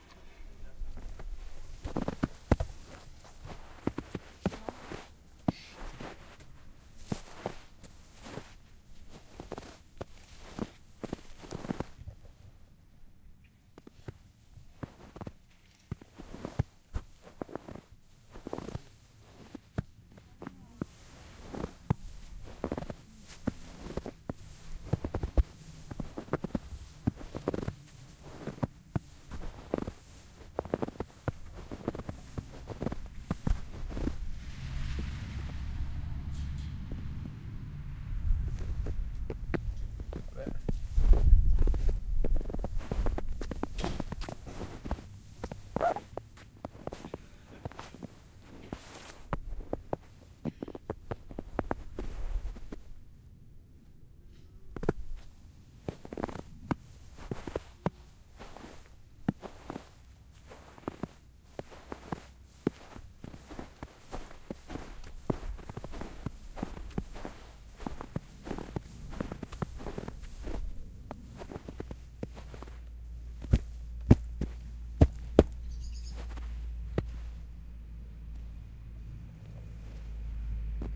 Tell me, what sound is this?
Snow Walinkg - B09h47m39s12feb2012
An unedited recording of me walking in the snow with leather shoes.
Recorded with a pair ofSE3 SE-Electronics and a Fostex FR-2LE.
foley, snow, snow-walking